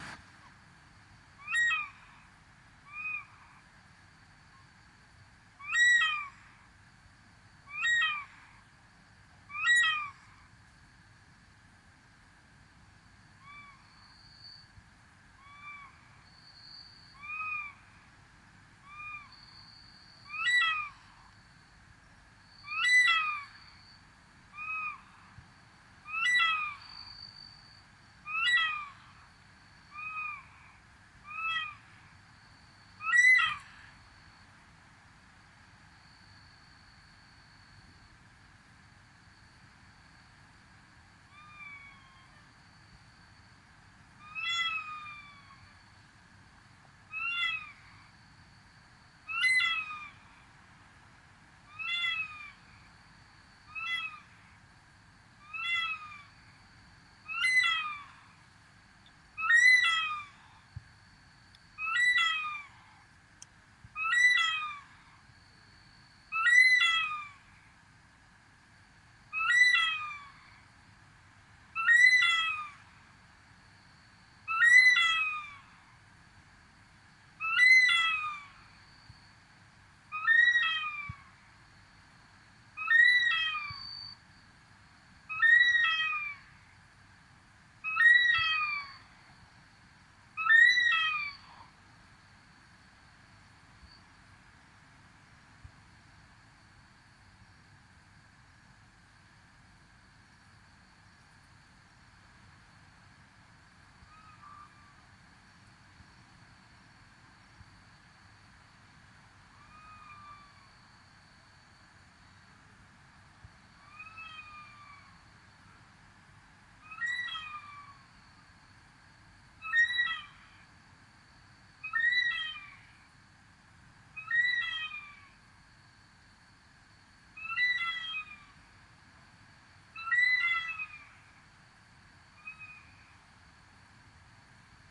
Nighttime seabirds and cicadas
late night plaintive calls from nesting birds accompanied by cicadas.
Recorded on Hamilton Island in the Whitsundays, Australia.